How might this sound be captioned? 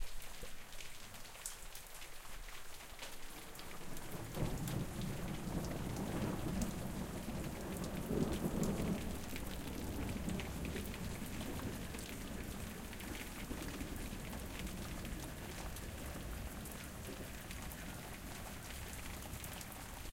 Rain and Thunder 2
field-recording, lightning, nature, rain, storm, thunder, thunder-storm, thunderstorm, weather